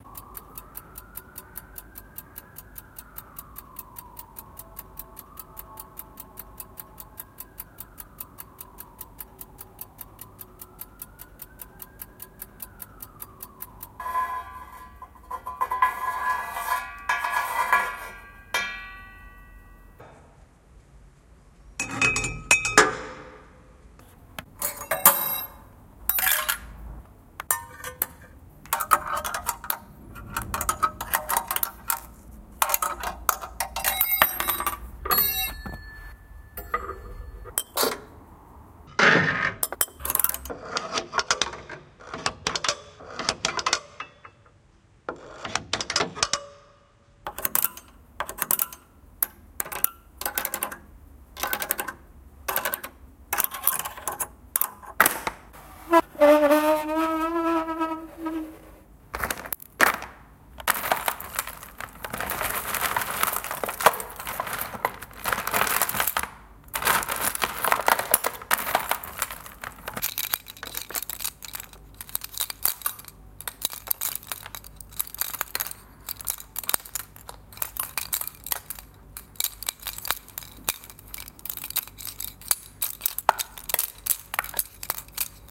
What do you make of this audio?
Junk shop
Hitting things with tiny spoons, rattling costume jewellery and a little bit of ambience.
Recorded with a Tascam DR-05.